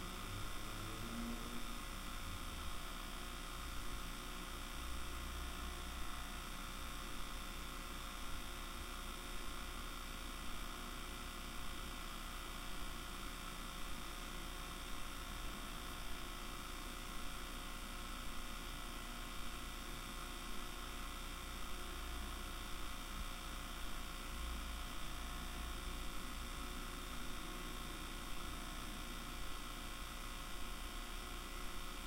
Simply a tape playing in my VCR. Similar to vcr03.wavRecorded with the built in mics on my Zoom H4 inside the tape door.
tape, vcr, transport, motor, drone